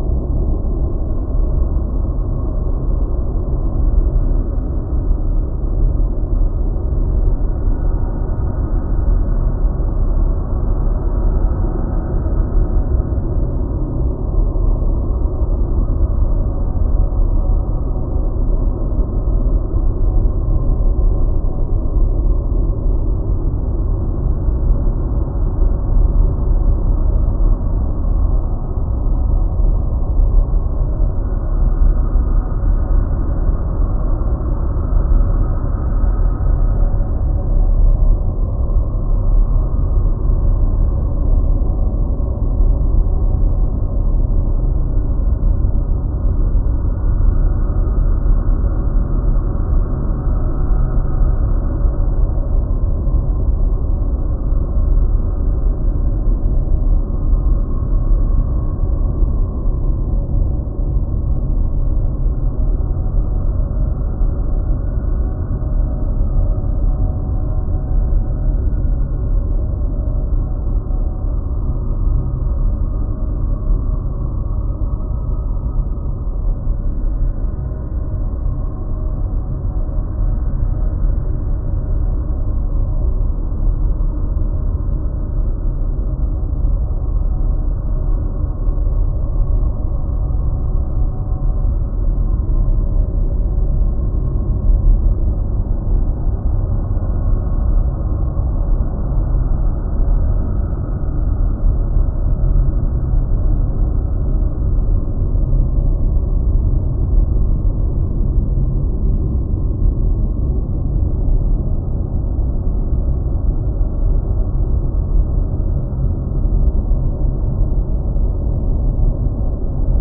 Sound built from bass drone mixed with delayed bells sound and wind sound. Effect is wind-like drone with subtle bells sound emerging.